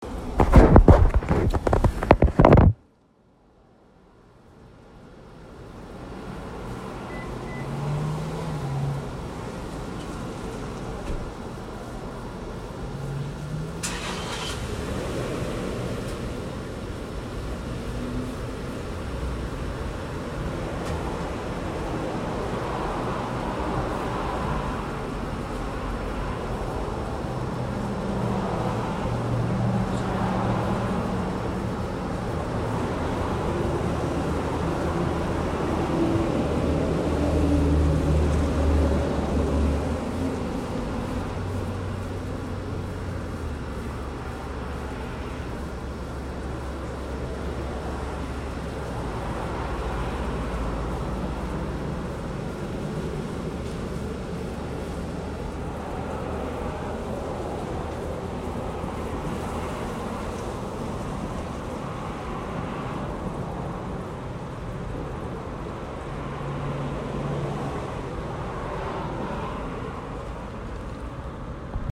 Ambience outside a seedy motel. A generator runs.
Ambience, Ambient, Motel, Seedy, Shady